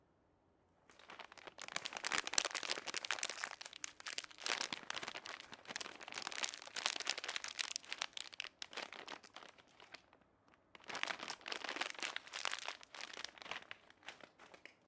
a Plastic sunflower seed bag opened